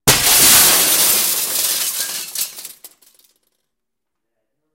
Windows being broken with various objects. Also includes scratching.